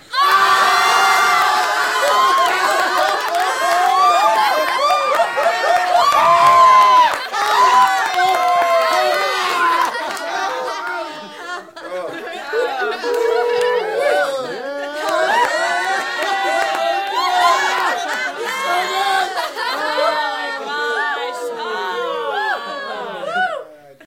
Laugh 3 funniest thing ever
Small crowd laughing at the funniest thing they've ever heard or seen
studio, laughing, group, crowd, hilarious, audience, loud, laugh, funny